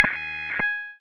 PPG 021 Fretless LeadSynth G#5
The sample is a part of the "PPG MULTISAMPLE 021 Fretless LeadSynth"
sample pack. It is a sound similar to a guitar sound, with some
simulated fretnoise at the start. Usable as bass of lead sound. In the
sample pack there are 16 samples evenly spread across 5 octaves (C1
till C6). The note in the sample name (C, E or G#) does indicate the
pitch of the sound but the key on my keyboard. The sound was created on
the Waldorf PPG VSTi. After that normalising and fades where applied within Cubase SX & Wavelab.
ppg, multisample, bass, lead